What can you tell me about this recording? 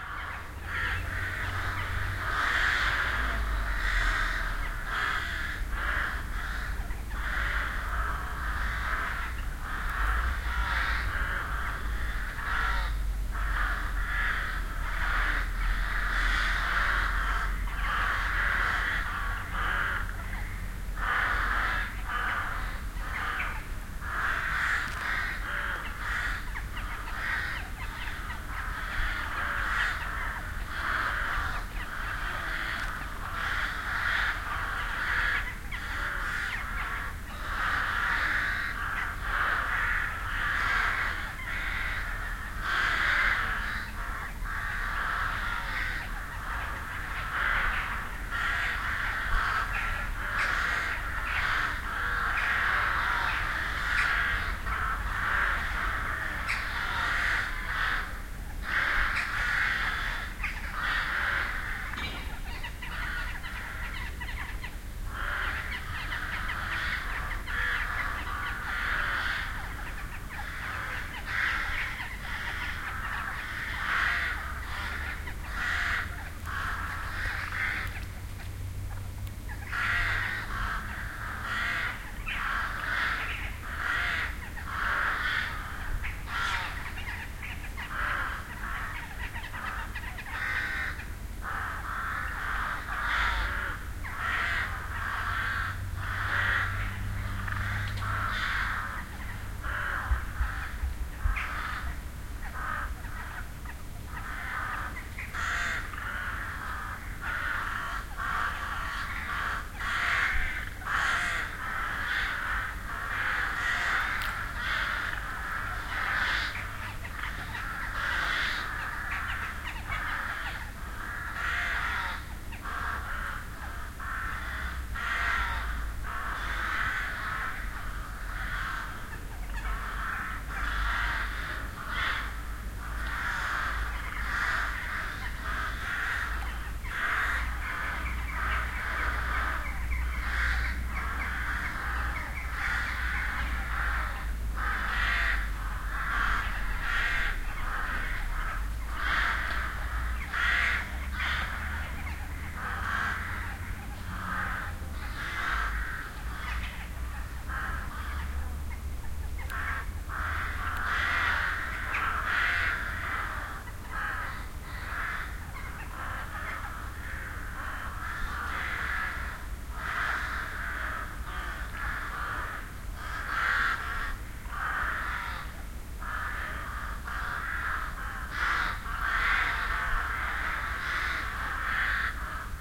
Recorded near the "sleeping-trees" of them crows in Kenmore / Perthshire. Sony TCD-D7 DAT recorder and Soundman OKM microphones. A bit like the Birds from Hitchcock.
binaural, pertshire, crows, scotland, kenmore, field-recording, spooky, the-birds, hitchcock